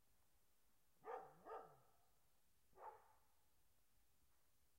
Dog barking from long distance
cz, czech, dog, panska